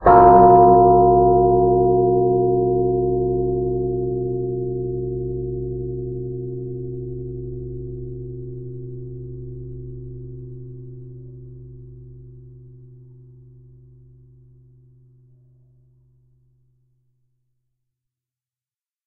Deep Bell
This is a remix of a high bell. After some complex filtering and re-pitching, here it is!